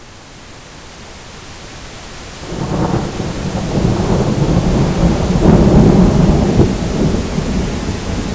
MONTECOT Mélissa 2015 2016 thunderstorm
Here, i tried to represent the rain with a thunderstorm in the background, a sound that can be used in a thriller, drama or other movies. To the sound of the rain i generated "white noise", amplitude to 1. Then, i changed the acute and bass across the track to show some instability of the rain. To highlight the suddenness of the rain, i put a fade in during the first 5 seconds with a reverberation from 6 to 50s to make a natural sound.
Finally, i added a track recorded of a storm with -9dB for the storm and + 11dB for the rain.
weather, storm, gust, cloud, tempest, squall, rain, rainstorm, thunderstorm, water